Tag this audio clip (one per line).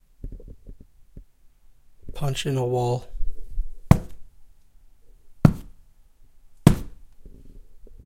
punch
wall